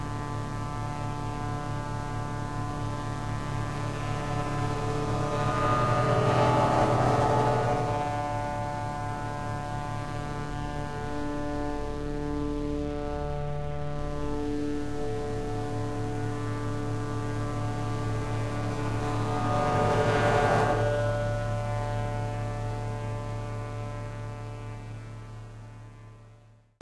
Amazing truck horn.
horn, passing, truck